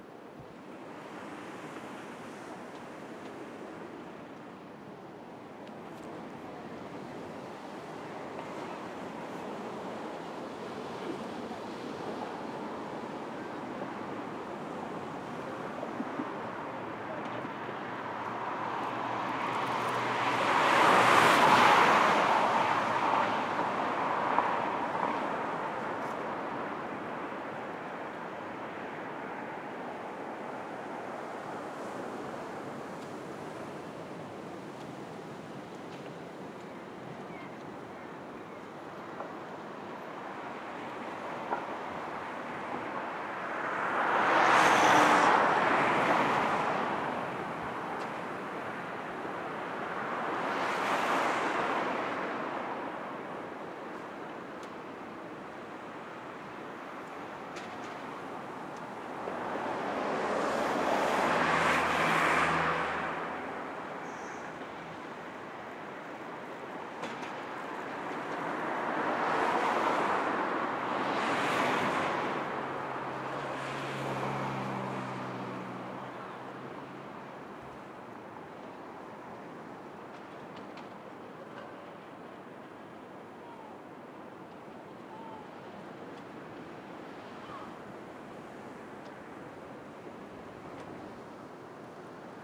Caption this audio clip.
Ambiente - trafico ligero 2
Ambience from light traffic
MONO reccorded with Sennheiser 416 and Fostex FR2
car, city, traffic